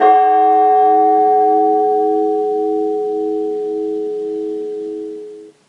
Church Bell
The sound of the bell of the Orthodox Church.
bell, recorder, percussion